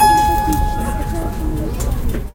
One key of an out of tune harpsichord on a flea market. Recorded on an Edirol R-09 with built-in mics.
flea, market